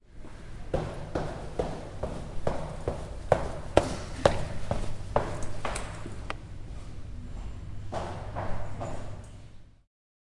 STE-030 Stairs
Walking down the stairs of the university.